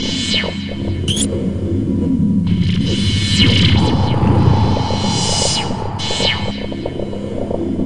a combination of samples make up this 2-bar ambient loop: some pitched
up clicks made the chirps, the background pad-like feel is created with
Native Instruments Reaktor and Adobe Audition; the panning rhythmic sound near the end of the loop was made with Ableton Live
2-bars, ambient, bleep, industrial, loop, pad, processed, rhythmic, sound-design